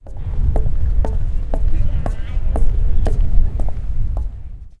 High-heeled shoes repetit
campus-upf, UPF-CS12